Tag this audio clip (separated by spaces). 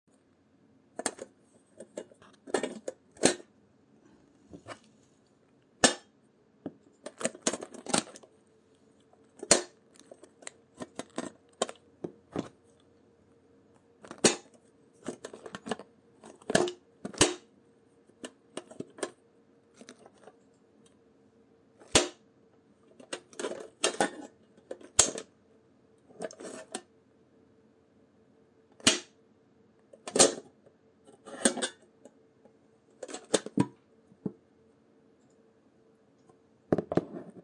click closing hit impact lid metal small thud